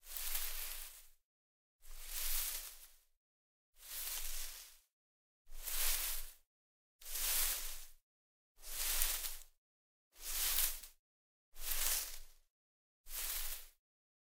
Foley Movement High Grass Mono
Foley movement through High Grass (x9).
Gear : Rode NTG4+
grass, footsteps, through, movement